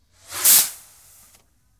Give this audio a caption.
Fireworks recorded using a combination of Tascam DR-05 onboard mics and Tascam DR-60 using a stereo pair of lavalier mics and a Sennheiser MD421. I removed some voices with Izotope RX 5, then added some low punch and high crispness with EQ.
bang, crackle, fireworks, whiz